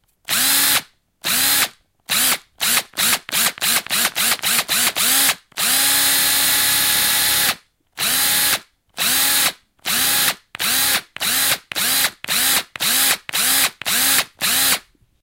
Drill Sharp Stops 2
Bang, Boom, Crash, Friction, Hit, Impact, Metal, Plastic, Smash, Steel, Tool, Tools